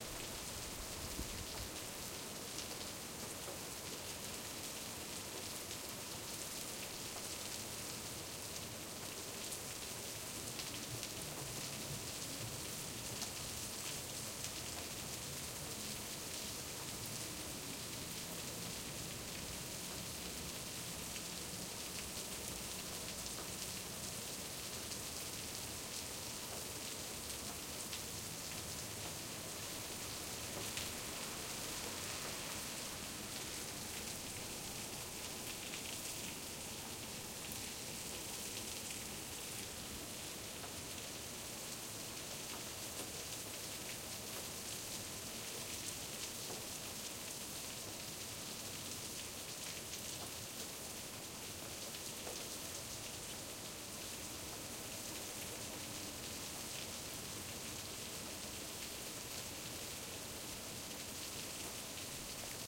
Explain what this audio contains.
Medium Rain Ambience edlarez vsnr
Medium Rain ambience _edlarez vsnr
raining, rain, weather, ambience, medium